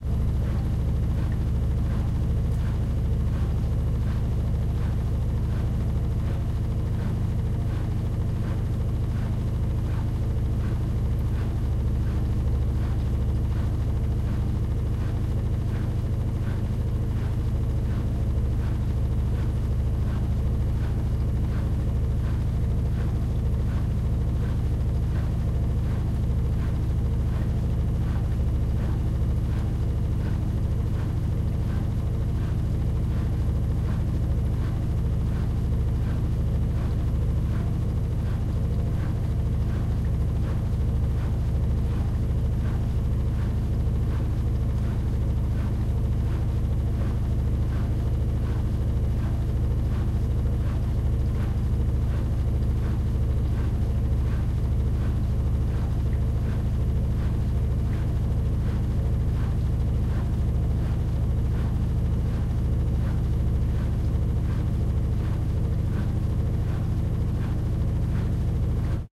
washing mashine light
Washing machine recorded from the front.
household, machines, noise, washing-machine